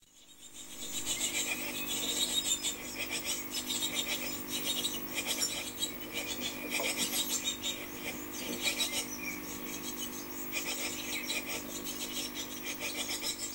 Baby Blue Tits
Seven chicks about 3 weeks old in nesting box linked to TV in lounge.